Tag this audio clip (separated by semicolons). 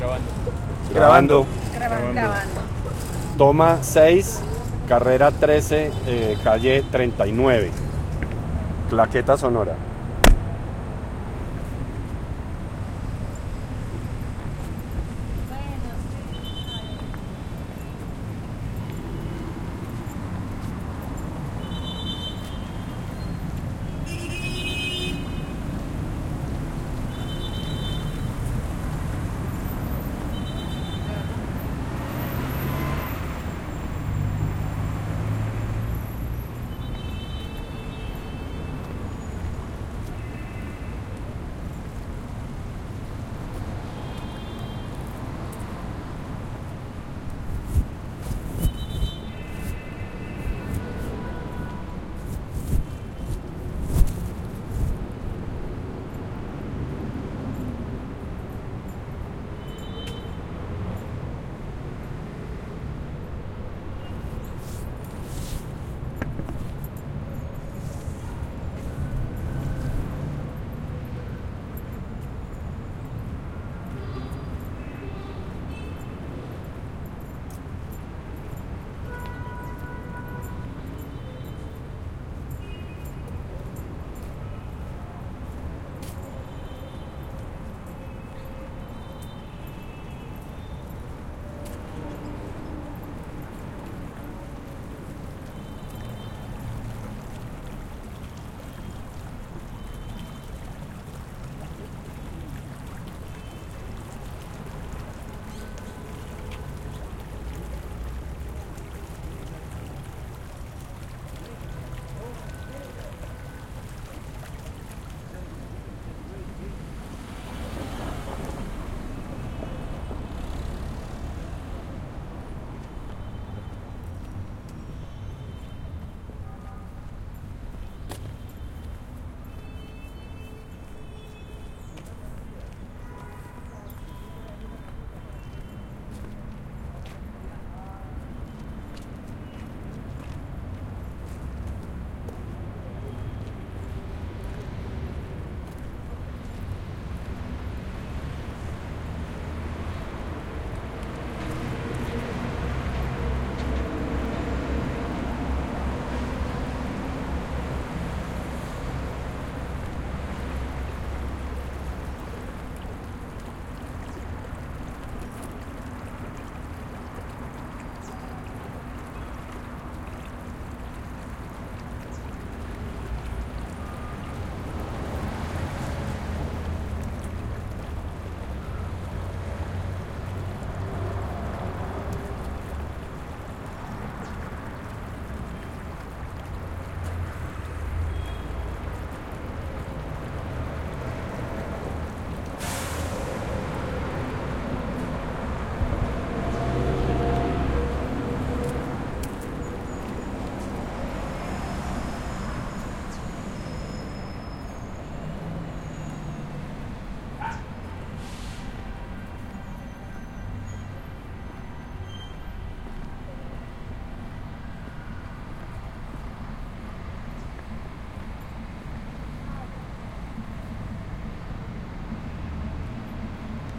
PAISAJE-SONORO; SOUNDSCAPE; VECTORES-SONOROS